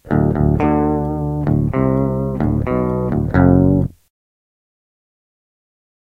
These loops are not trimmed they are all clean guitar loops with an octive fx added at 130BPM 440 A With low E Dropped to D